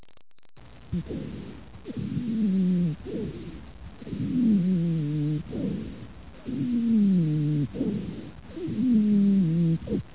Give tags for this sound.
pulmonary; anatomy; body

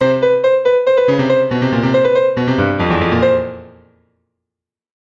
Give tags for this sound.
140bpm; bass; fast; feeling; keys; loop; percussion; piano; stabs

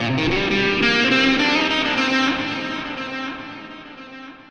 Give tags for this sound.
guitar; music

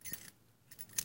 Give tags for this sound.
metal; field-recording; iron

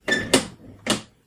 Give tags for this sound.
Switch; Door; Lever; Metal; Turn; Garage; Handle

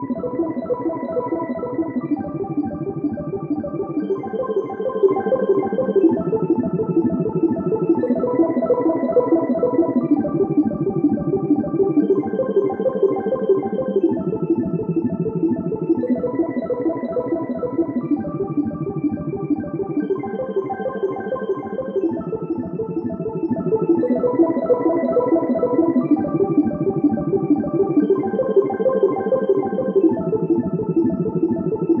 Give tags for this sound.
arpeggiated; melody; softsynth; space

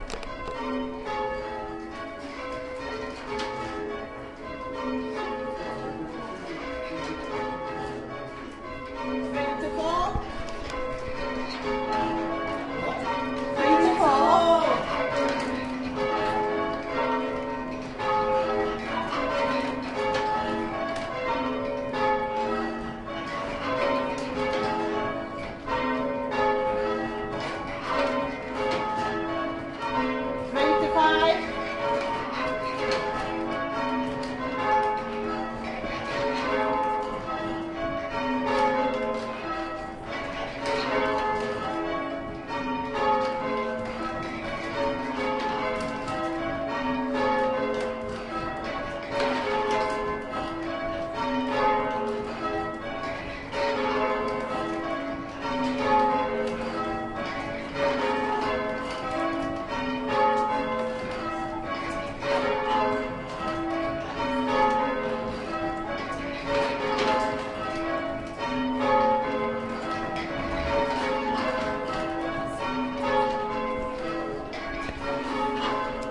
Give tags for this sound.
field-recording; church; interior; bell-ringing; change-ringing; campanology; bells; belfry; ringing; chamber

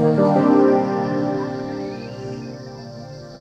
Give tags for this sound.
ambient; ident; nice; sound

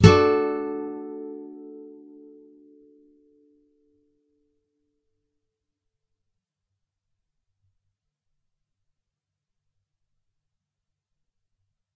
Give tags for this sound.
guitar; bar-chords; chords; acoustic; nylon-guitar

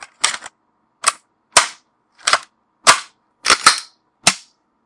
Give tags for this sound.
magazine; gun; AR15; reload; clip

